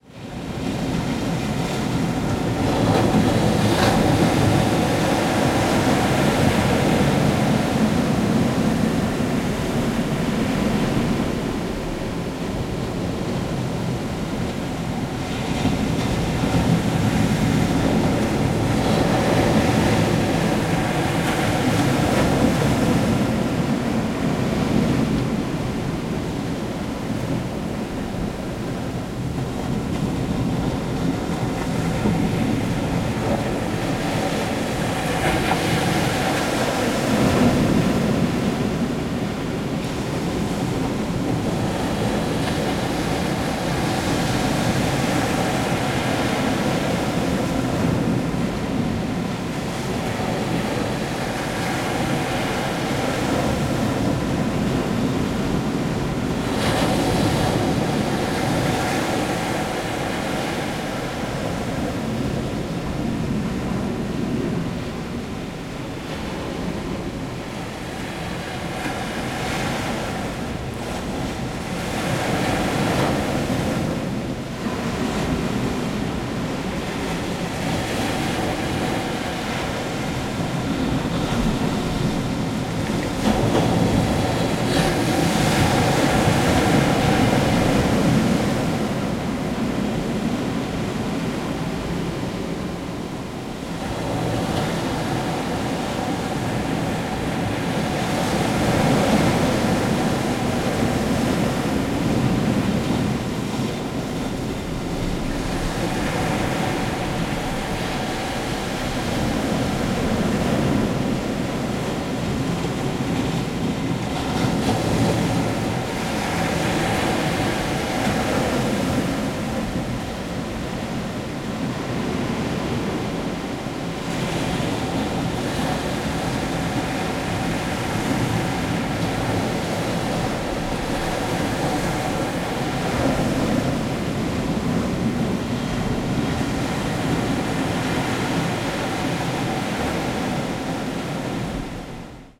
Ocean waves recorded from inside a 1.5ft rock cavity. As with other sounds in this collection, this is an attempt to capture natural phase-shifting by placing the H4 in rocks and crevices.